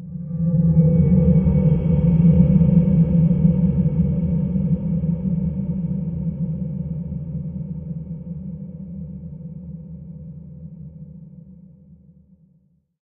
Nice ethereal sound. This sample was created using the Reaktor ensemble Metaphysical Function from Native Instruments. It was further edited (fades, transposed, pitch bended, ...) within Cubase SX and processed using two reverb VST effects: a convolution reverb (the freeware SIR) with impulses from Spirit Canyon Audio and a conventional digital reverb from my TC Electronic Powercore Firewire (ClassicVerb). At last the sample was normalised.
ambient, deep-space, drone, long-reverb-tail